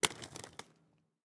Skate-concrete 8
Concrete-floor, Foleys, Rollerskates